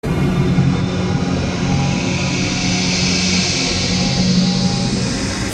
presented in doubly
sounds again like a musical logo for a film. cinematic, witch-house-.y
distorted, threatening, backwards, sound, synthetic, distortion, logo, found